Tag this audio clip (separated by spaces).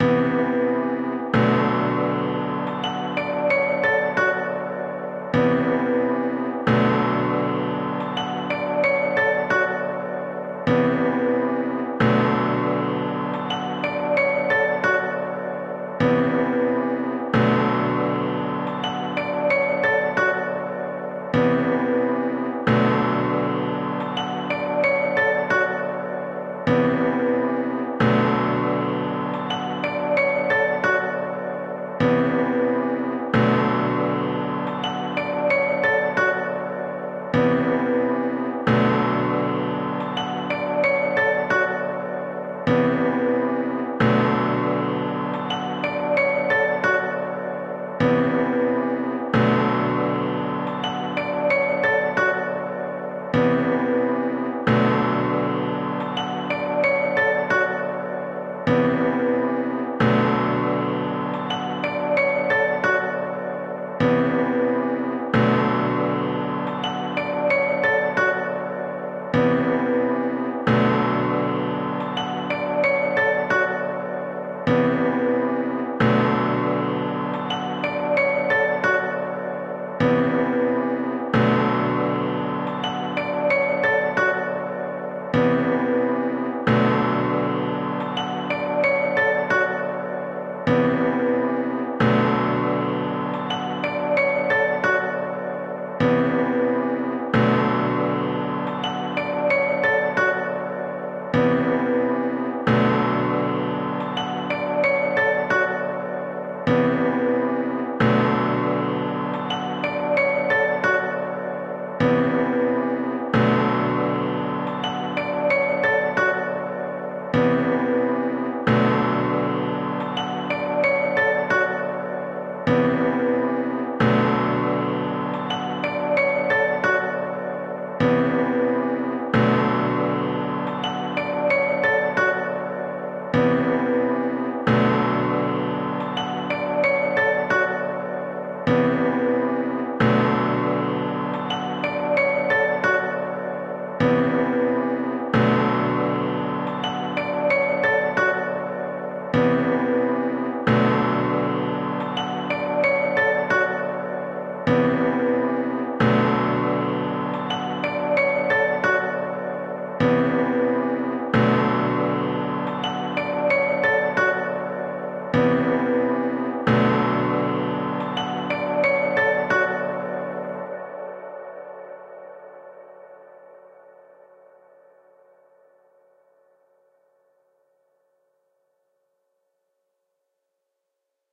90 backround bass beat bpm drum free loop loops music percs piano podcast